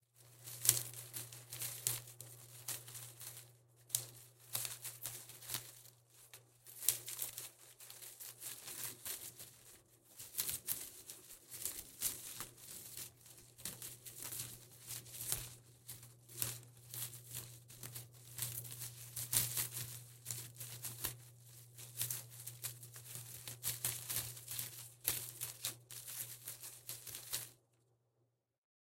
Fingers Rustling Through Plant
Fingers Rustling through an indoor plant.